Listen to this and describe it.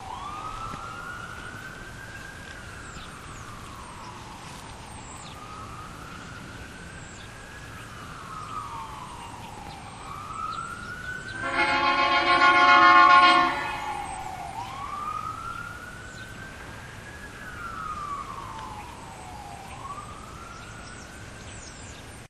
ambulance siren & air horn
An ambulance siren with a blast from their air horn too.